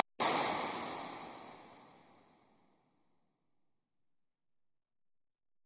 Klick Verbs-32
This is a random synthesized click-sound followed by a reverb with 200 ms pre-delay. I used Cubase RoomWorks and RoomWorks SE for the reverb, Synth 1 for the click and various plugins to master the samples a little. Still they sound pretty unprocessed so you can edit them to fit your needs.
roomworks, impuls-response